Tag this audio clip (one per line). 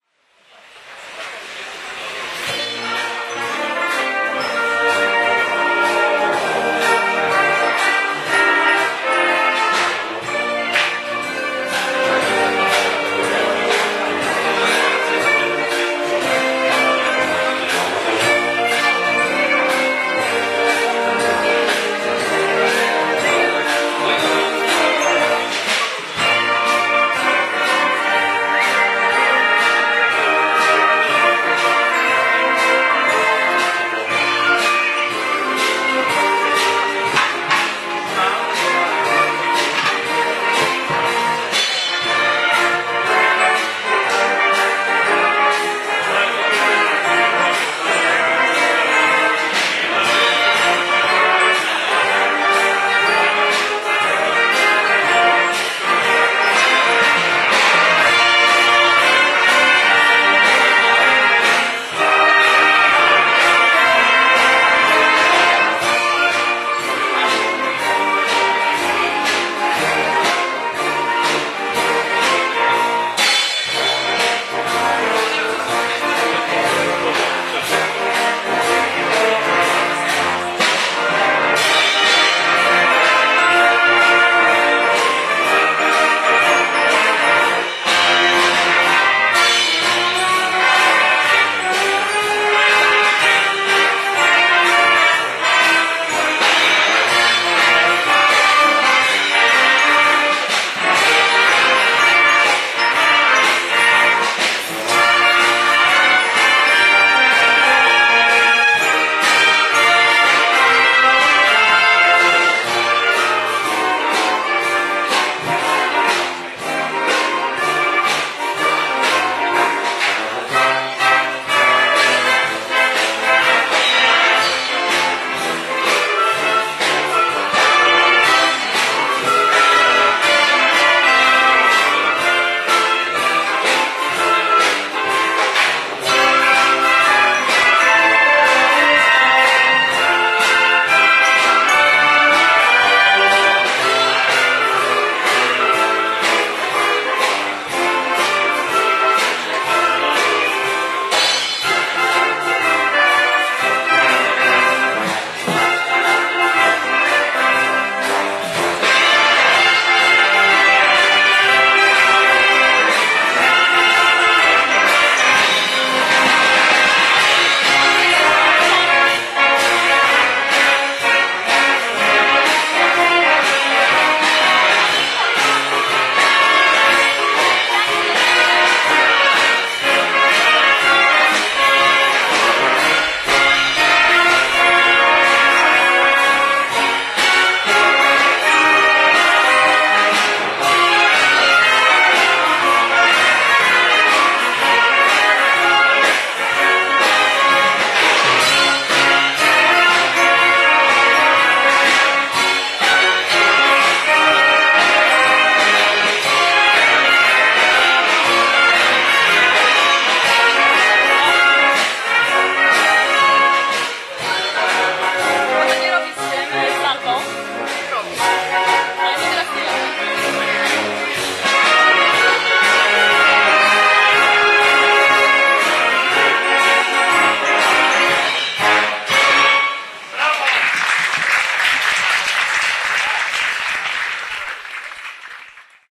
poznan
pasazkultury
opening
orchestra
oldmarket
ceremony
maciejkurak
brassband
ramaja
wrzesnia
art